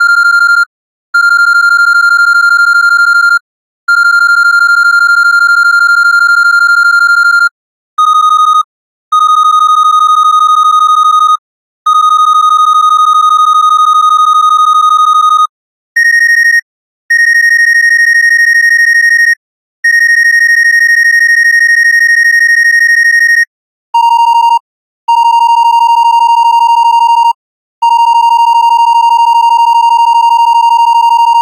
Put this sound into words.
Alot of classic video games played annoying beeping noises while text appear on screen. This is a collection of similar sounds.